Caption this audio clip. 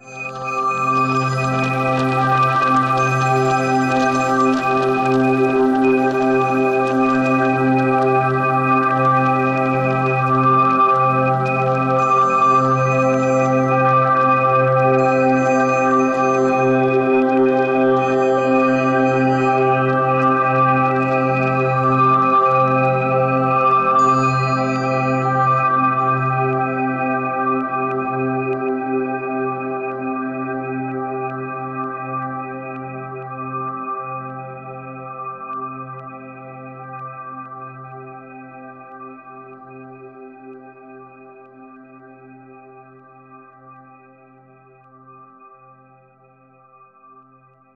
This is a deeply textured and gentle pad sound. It is multisampled so that you can use it in you favorite sample. Created using granular synthesis and other techniques. Each filename includes the root note for the particular sample.
ambient, digital, granular, multisample, pad, space, synth, texture